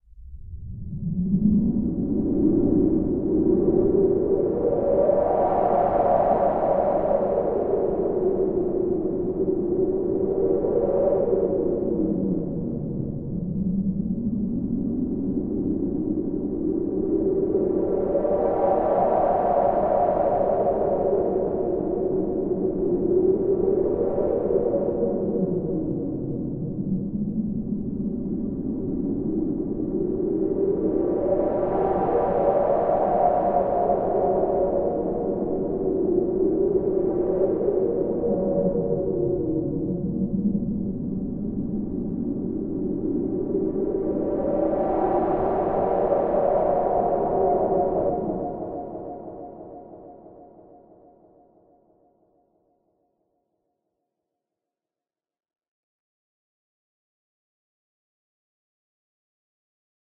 ELEMENTS WIND 02 Space-Storm
Sound created for the Earth+Wind+Fire+Water contest
This file as been generated with Crystal vsti
3 oscillator with 3 noise wave-form
it share the same amplitude curve
but the filter envelope it's kinda different
each osc was panned, delayed and filtered by lfo
via modulation matrix
The result is a kinda windy soundscape
it coul be in handy for illbient and scores mainly
beatmapped at 120 bpm, exactly 1 minute long